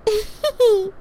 Girl laugh
Yet another female laugh.